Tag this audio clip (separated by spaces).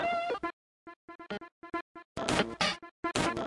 loop drumloop thing noise know dont